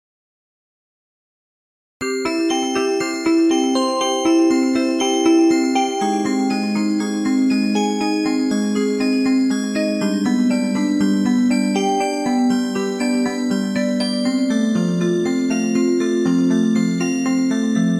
angelic pad using harmonics ...